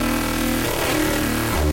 140 Sizemick Synth 01
bit, blazin, crushed, distort, gritar, guitar, synth, variety